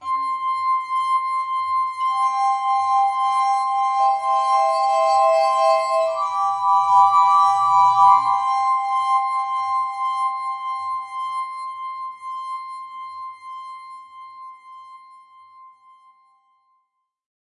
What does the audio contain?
CMajor I V7 I Water Glasses I
Water glasses tuned to complete the chord structure of I V7 I in C Major